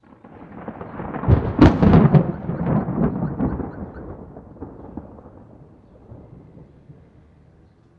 This first one in the pack is the loudest hit. None of the other samples are 'normal'. Notice my neighbor's car alarm going off the thunder was so loud. It's not loud in the mix.
loud, thunder